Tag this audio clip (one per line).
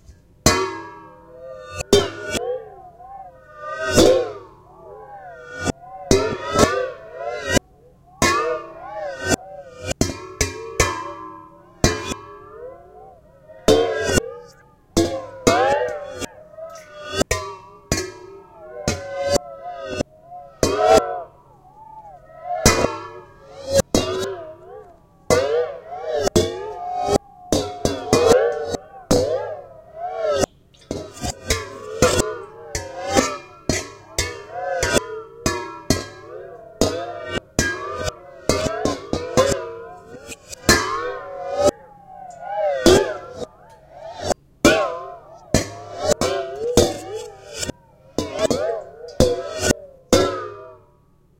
Water
Splash
Liquid
Springy
Boing
Bowls